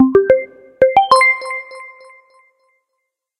131659 bertrof game-sound-intro-to-game & 80921 justinbw buttonchime02up 7
chime, sounds, attention